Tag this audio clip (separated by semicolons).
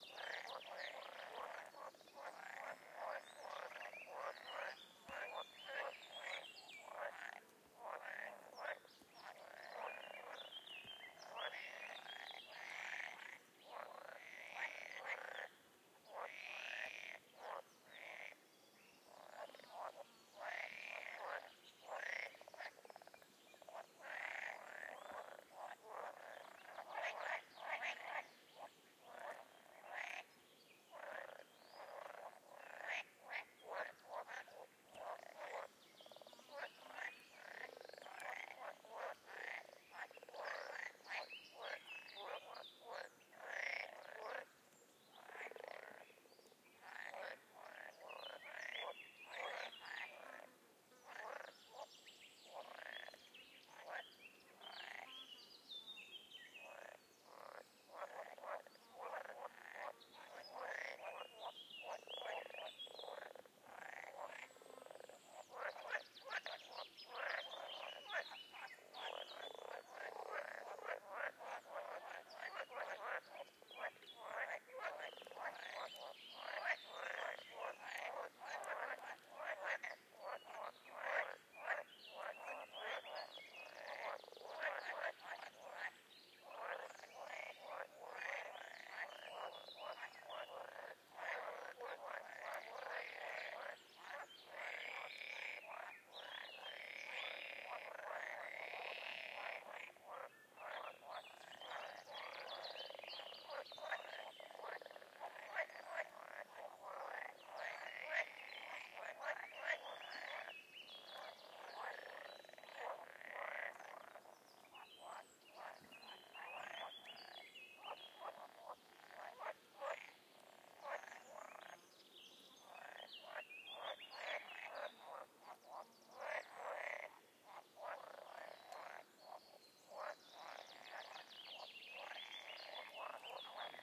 ambiance; ambience; ambient; atmos; atmosphere; birds; bird-song; birdsong; croak; field-recording; forest; frog; frogs; insects; nature; soundscape; summer; thuringian-forest; toad; toads; woods